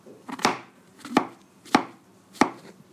snijden champignons
Cutting mushrooms on a synthetic cutting board. Recorded with an iPhone 6.
kitchen, cutting, vegetables, mushroom, cooking